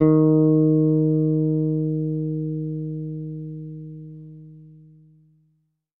Second octave note.